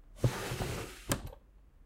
Oppening an old drawer